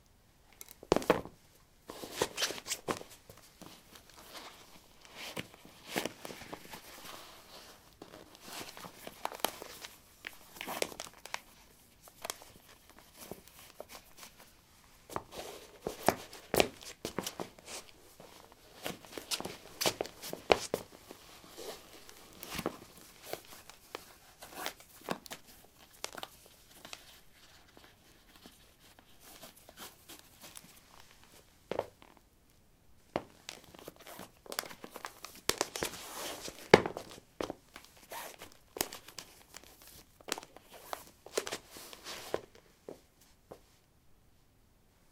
lino 12d squeakysportshoes onoff
Putting squeaky sport shoes on/off on linoleum. Recorded with a ZOOM H2 in a basement of a house, normalized with Audacity.